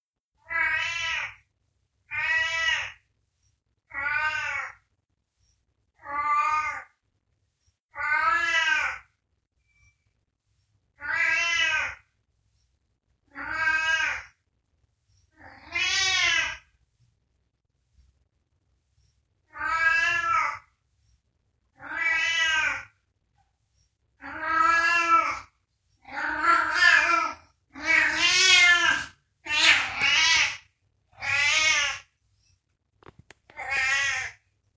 cat meowing
Gato grabado en una cochera maullando y demandando atención./Cat recorded in a garage meowing and demanding some attention.
animals, cat, meow, pet